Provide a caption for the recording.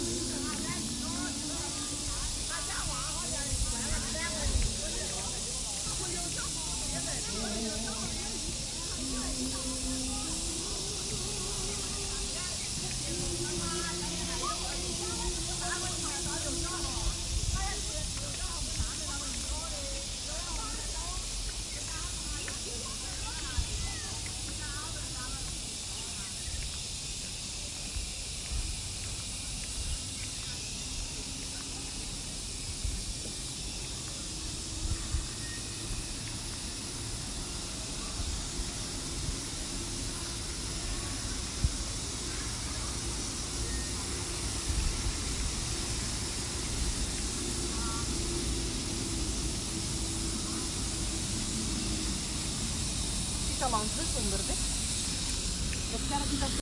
China Yangshuo park West Street No.109 (West Street Upper)
China Yangshuo park West Street No.109 (West Street Upper